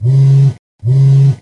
Vibration of the phone on the table